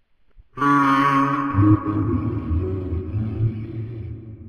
Dark Voice
Made with a recording of my cousian singing "We will, We will rock you!" Into the mic and edited with Audacity.
Horror, Unsettling, Spooky, Dark, Monster, Eerie, Scary, Jumpscare